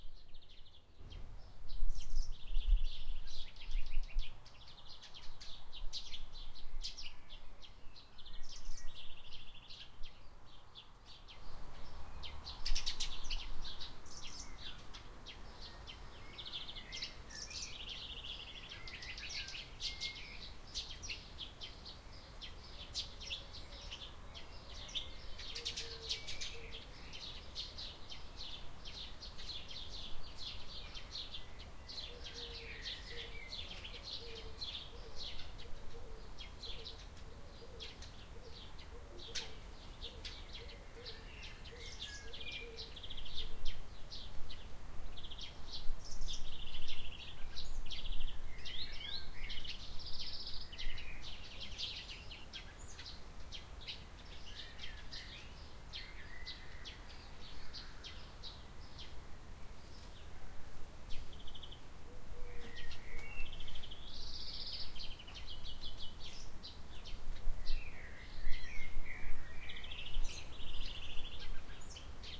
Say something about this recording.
Garden Sunny Day
German Garden Ambience recordet on a Zoom H1 on a sunny Day with birds. By studio.bayern
Garden, Birds, Ambience, Sunny, Germany